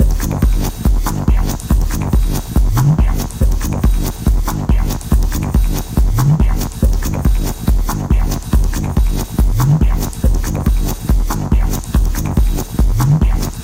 minimal techno loop 3 eq2.
bounce, club, dance, dj, drum, effect, electronic, house, kick, loop, minimal, mix, original, rave, sound, techno